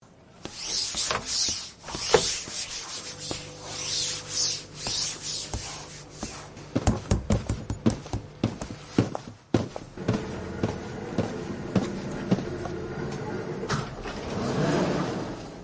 tcr soundscape Hcfr-florine-anouck
France, Pac, Soundscapes